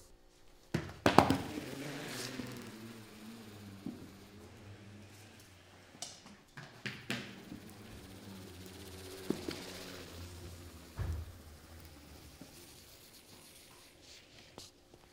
mono to hard disk recorder 702 with Audio Technica AT875R mic
kid rolling on concrete floor in heelie shoes